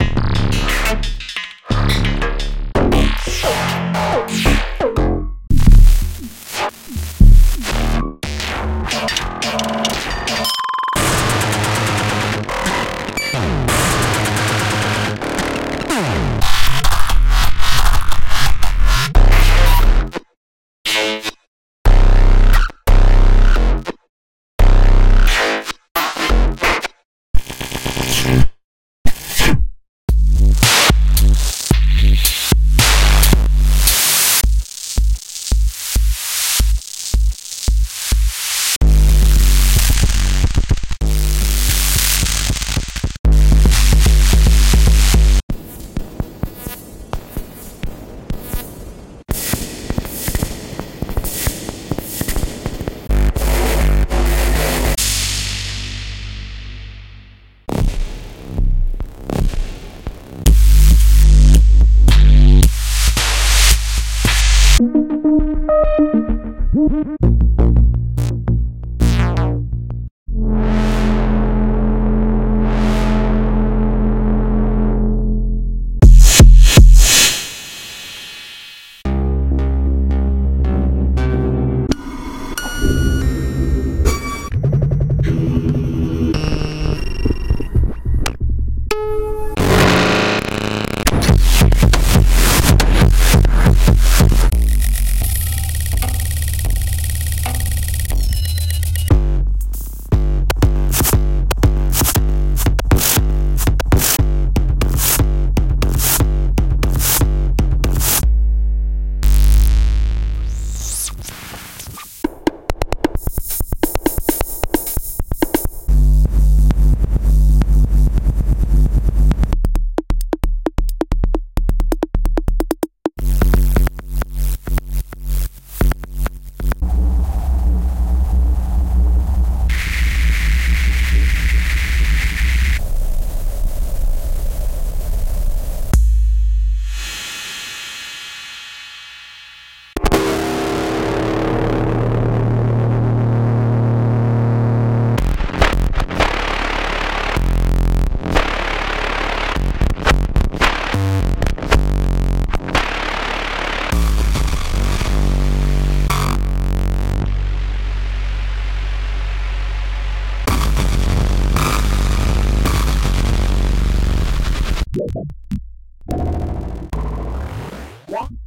Surachai Morphagene Reel
"Created and formatted for use in the Make Noise Morphagene. The sounds contain elements that I’m drawn to like dynamics, distortion, rhythm, and artifact noise. If users are looking for noise floors, breathing drum loops, and grimy textures, hopefully they will find it inspiring or useful enough to let it occupy the 64.7 MB of space on their MicroSD cards."
dynamics, drumloop, grimy-texture, rhythm, distortion, morphagene